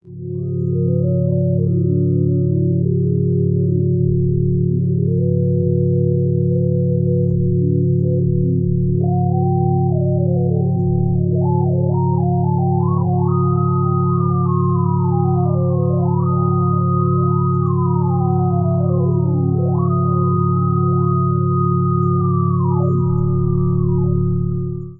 A sound created in Giorgio Sancristoforo's program Berna, which emulates an electro-acoustic music studio of the 1950s. Subsequently processed and time-stretched approximately 1000% in BIAS Peak.
Berna, time-stretched, Sancristoforo, ambient